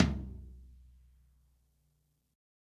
Low Tom Of God Wet 001
set, pack, drum